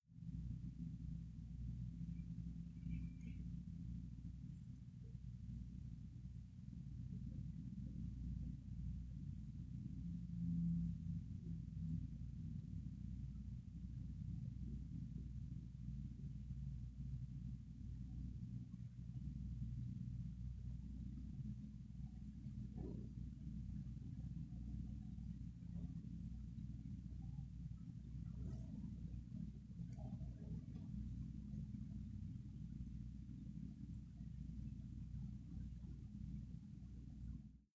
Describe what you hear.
22-Environment Night Building
Environment Night Building